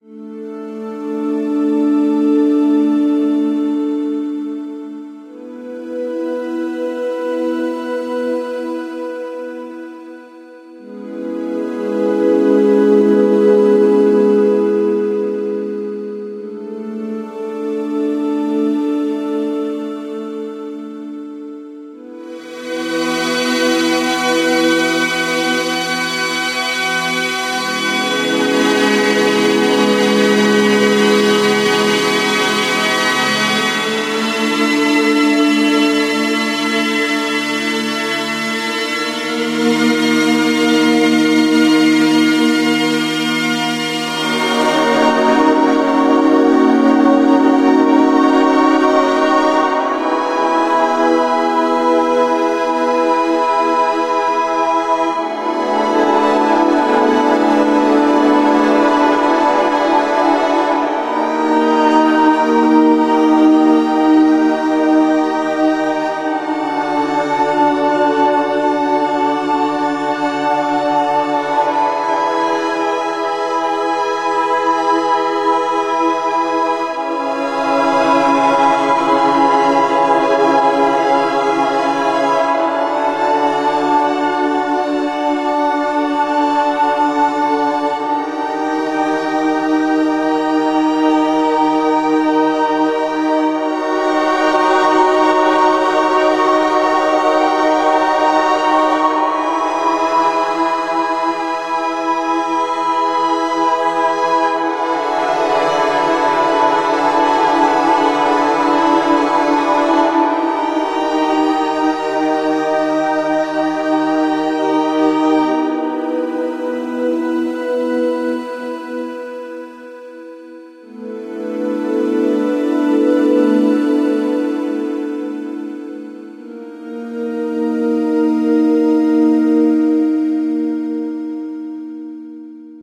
Era of Space
A Main Menu theme I created for my Space Game. It alternates between quiet strings and loud ones and adds some choirs here and there. Hope you enjoy!